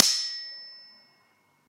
Sword Clash (14)
This sound was recorded with an iPod touch (5th gen)
The sound you hear is actually just a couple of large kitchen spatulas clashing together
clang, clanging, clank, clash, clashing, ding, hit, impact, iPod, knife, metal, metallic, metal-on-metal, ping, ring, ringing, slash, slashing, stainless, steel, strike, struck, sword, swords, ting